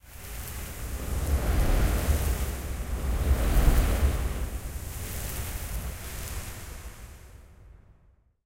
burning air
Small paper particles burn from right to left.
Pequeñas partículas de papel arden desde la derecha a la izquierda.
air, aire, burning, fire, flame, fuego, panned, particles, pass-by, quemando, soft, swoosh, whoosh